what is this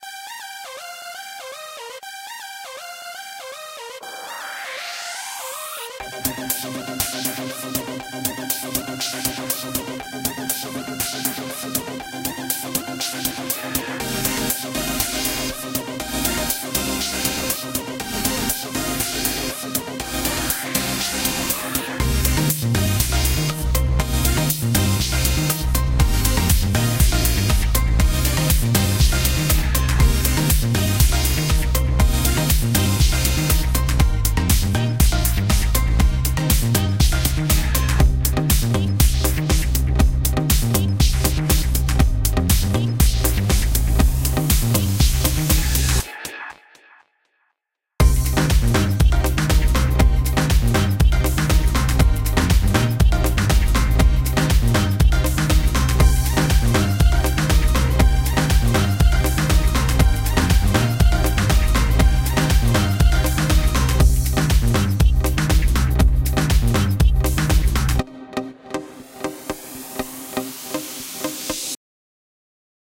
Synth/bass Short or Intro
I created this "happy" sort of synth intro again, with Garageband. The initial idea I had for the song was something that had to do with ice and crystals, which you can kinda get out of the tone.
Enjoy!